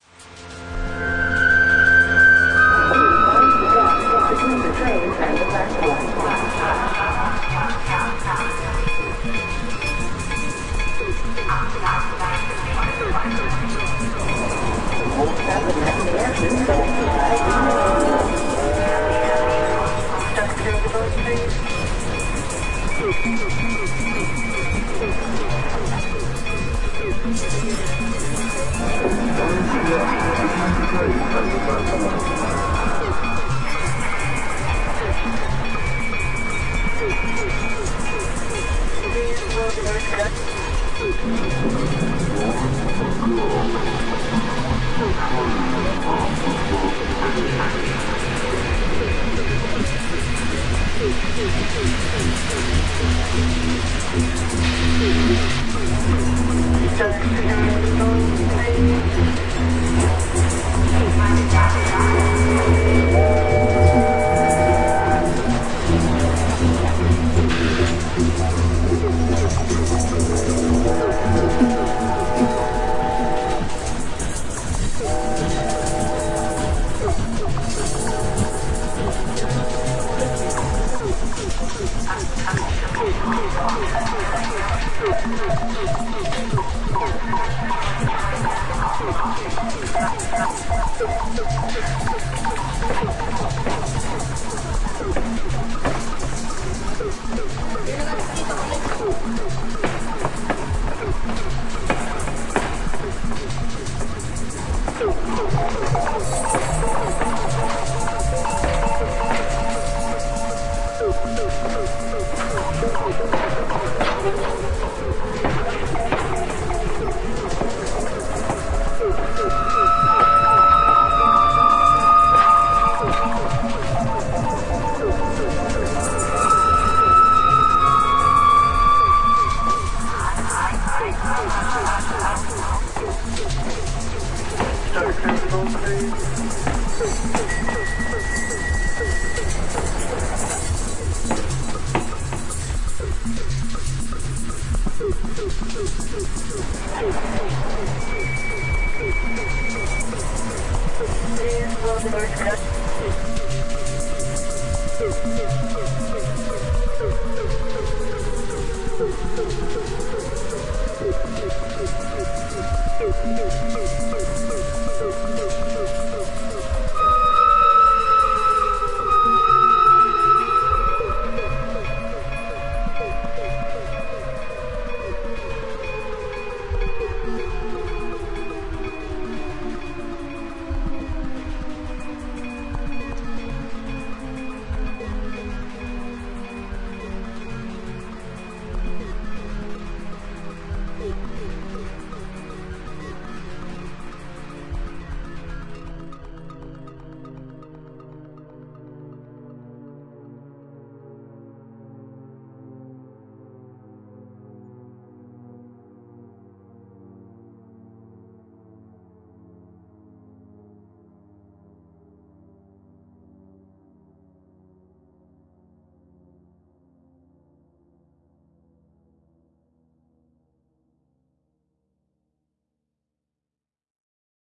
Train alarm
Made for continuum 2.
This sounds a little like something you would hear in Half life 2, i think :)
alarm, soundscape, continuum-2, train, fun, continuum2